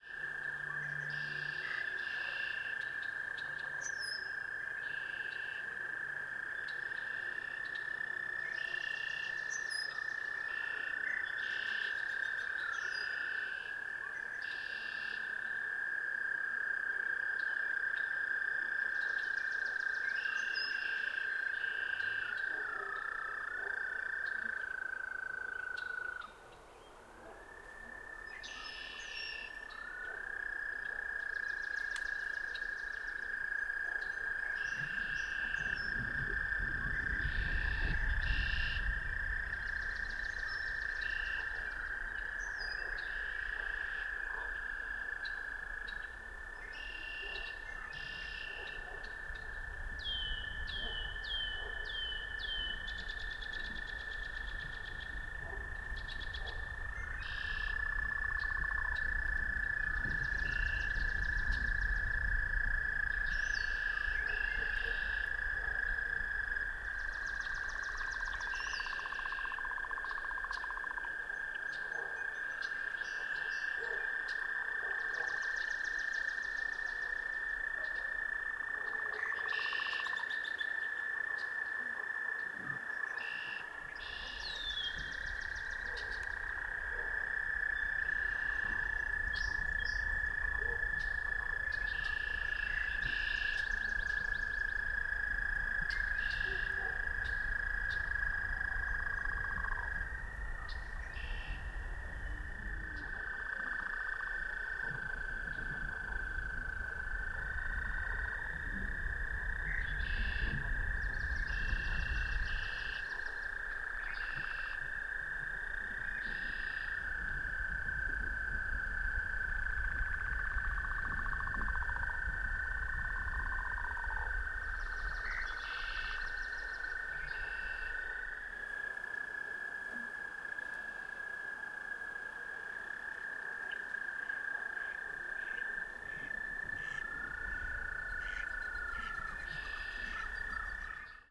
Active marsh in spring at dusk, some wind. distant dog barks. Active frogs and birds.
This is the front pair of a QUad recording done with a ZoomH2. (The file with the same name but MS is the back pair).